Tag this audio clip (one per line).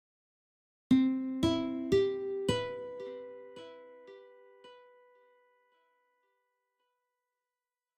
minor
clean-guitar
short
chord